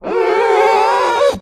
Single pass of fingers rubbing over glass. Recorded onto HI-MD with an AT922 mic and lightly processed.
request, rubbing